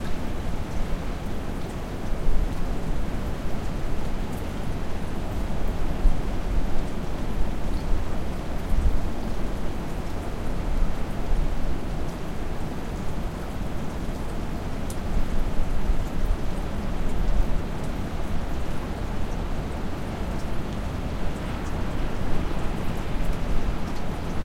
ambience rain porch
Recording of rain on porch. Distant waves from ocean.